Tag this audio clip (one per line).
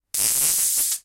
collide
magnet
magnetic
magnets
metallic
oidz
sizzle